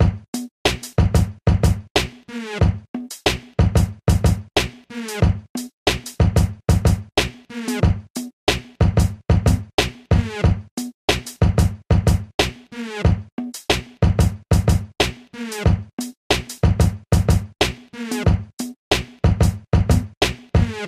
Hip Hop Drum Loop01
Great for Hip Hop music producers.
beat, drum, hip, hop, loop, sample